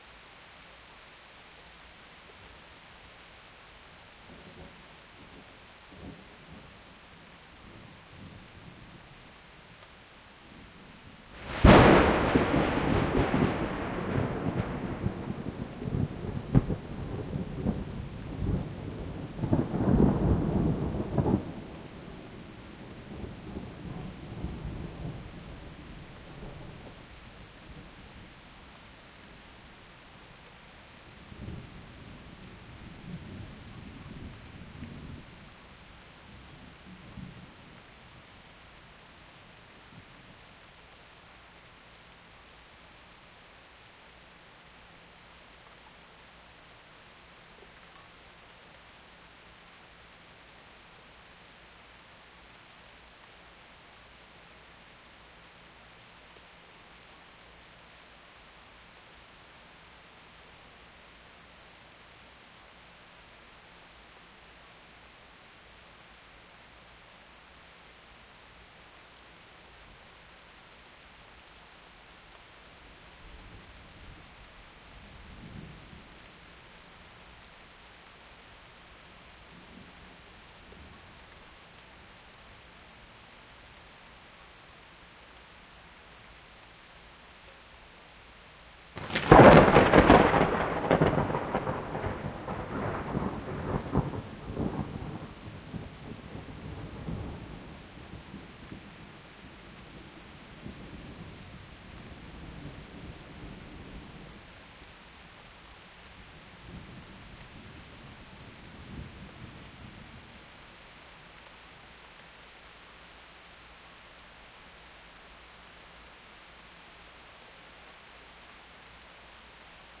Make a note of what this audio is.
I was recording sounds of a summer storm from inside a garage with the garage doors open. As it happens, two very close lightning strikes hit trees across the street from me as I was recording. This file was recorded using 2 Rode NT2a microphones in XY configuration. A Sound Devices 744t recorder with high pass filter and limiter engaged. No processing was done to the recording.